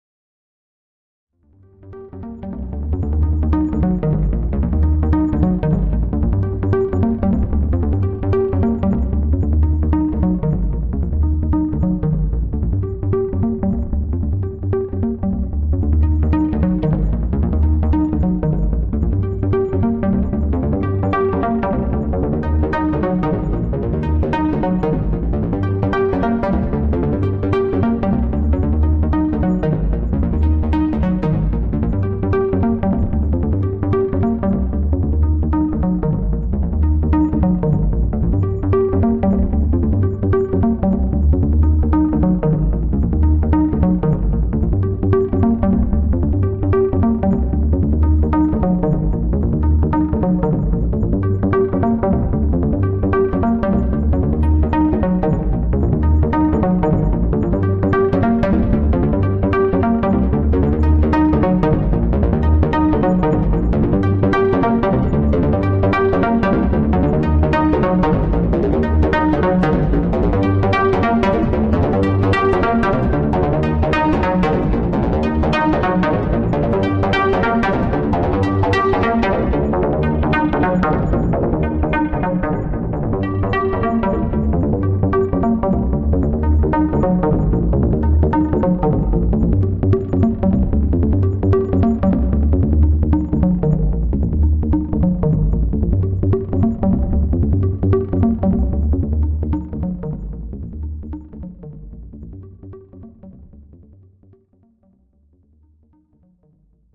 Bass heavy synth sequence at 150 bpm.